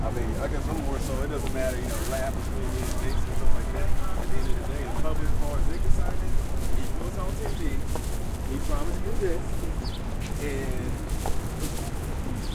Sidewalk Noise with Birds Chirping
nyc, central, park, new-york, chirping, field-recording, birds, city